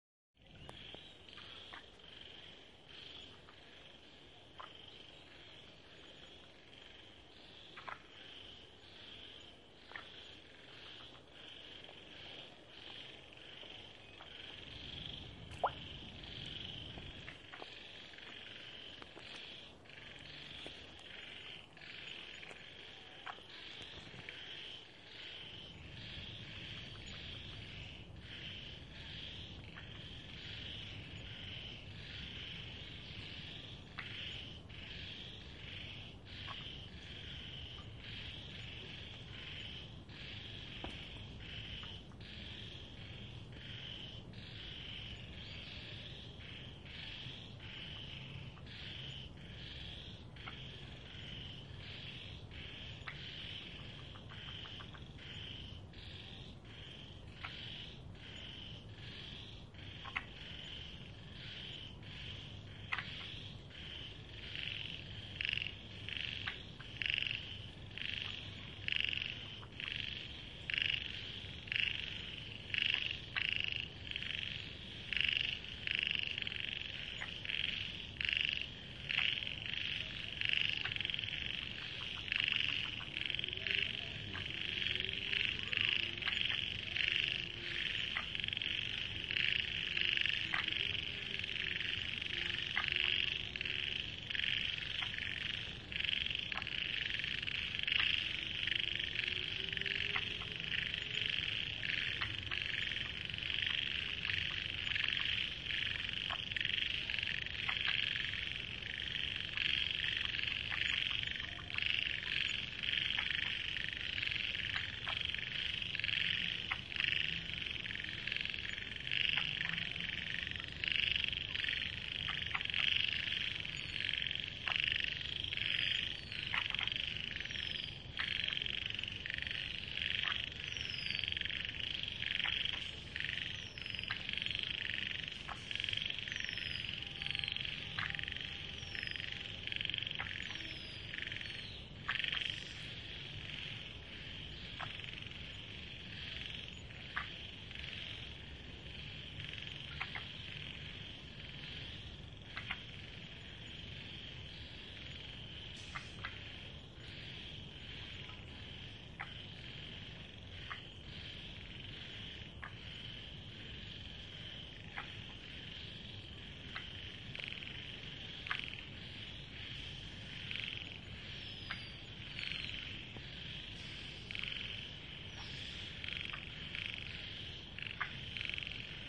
Apr 17, 2017
Recorded at a pond in Precious Stone Hill, Hangzhou (30°15'35.5"N 120°08'40.0"E), with my Samsung Galaxy S7